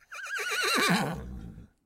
Horse Whinny 1
Horse whinny isolated from larger file of barn noises. Tascom.
barn, stable